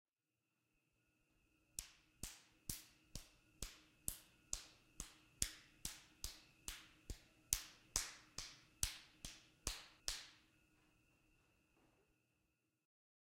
Slap Hands
Me slapping my right hand against the top of my left hand.
hands, MTC500-M002-s13, slap